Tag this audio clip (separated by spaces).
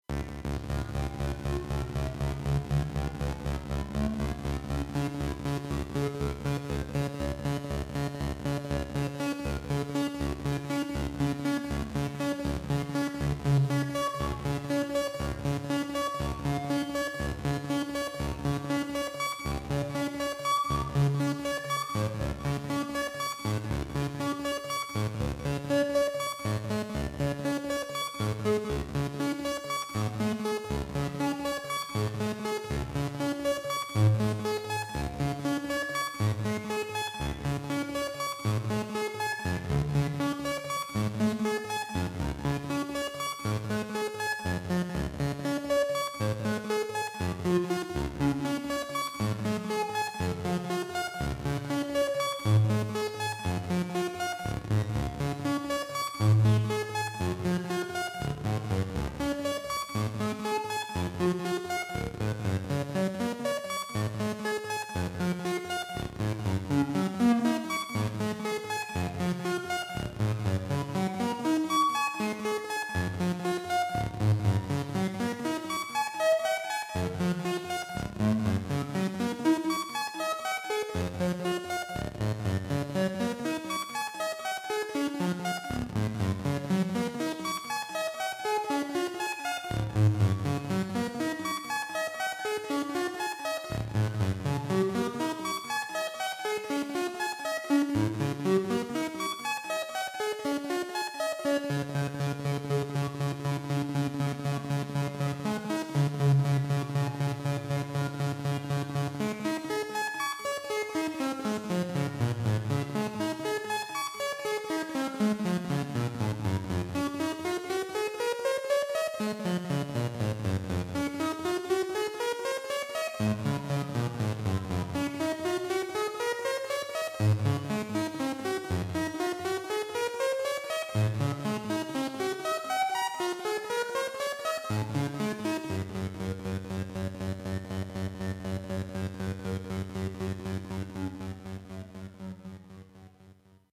ambient Blofeld drone eerie evolving experimental pad soundscape space wave waves